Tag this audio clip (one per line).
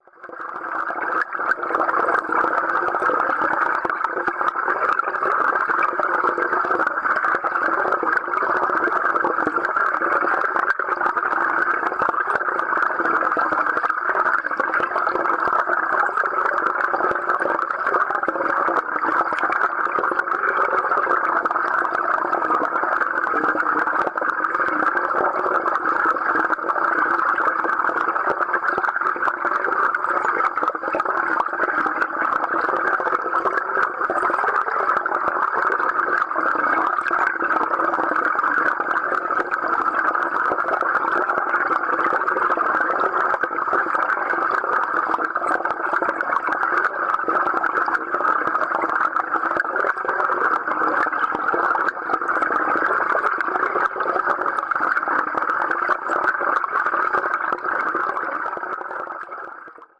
bubbles
eerie
hydrophone
newport
southwales
strange
submerged
underwater
water